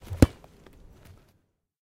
soccer kick 01
kicking a ball